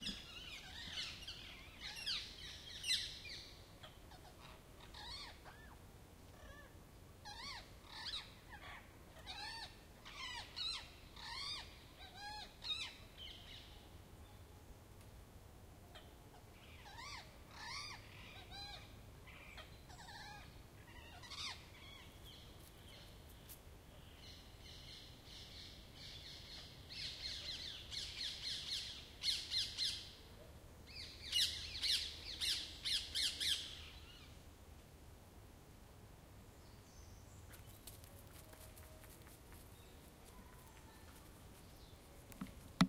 psittacula krameri 20151031
This is a recording of the rose-ringed parakeet (Psittacula krameri) in the Waldpark forest near Mannheim, Germany (Introduced species from Asia/ Africa).
I recorded it in the late morning in 2CH-mode with my Zoom H2n on a sunny golden October day. In the background sometimes steps of my dog.
ambiance, autumn, birds, field-recording, forest, nature